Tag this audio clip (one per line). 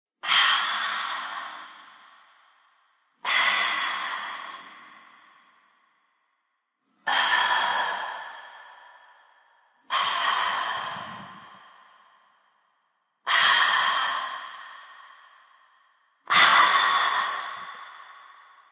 airy
beverage
breath
breathe
drink
female
human
out
pop
refreshed
refreshing
relief
relieved
reverb
sign
sip
soda
taste
woman